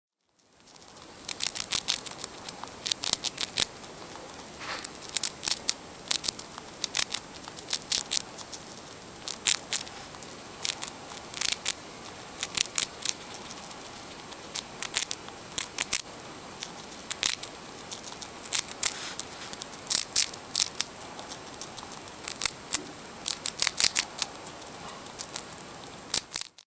A mouse eating a piece of prawn cracker from the Chinese restaurant! This was the soundtrack to a 26-second film clip of a little black pet mouse having a treat. Some ambient noise, birdsong may be heard in the background - hopefully not too much. Had to grab the moment while I could!